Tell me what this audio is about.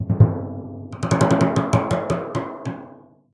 Supposed to be a levelup notification in a role playing video game.
Based on